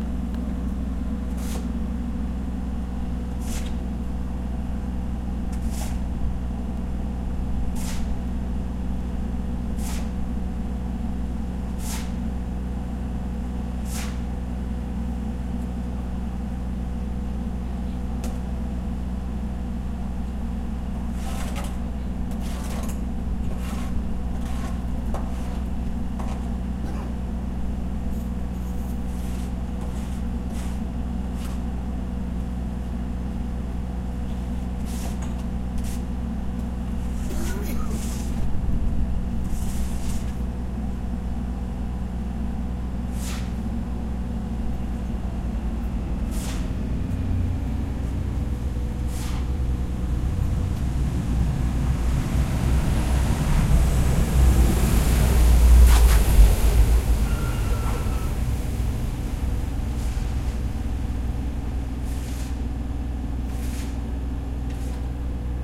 werken en traktor
construction-works, road, street-noise, traffic